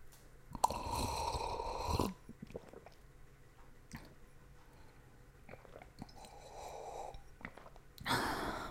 This sound is part of the sound creation that has to be done in the subject Sound Creation Lab in Pompeu Fabra university. It consists on a man drinking an orange juice for breakfast.
Juice, OrangeJuice, UPF-CS14, breakfast, drink, glass, liquid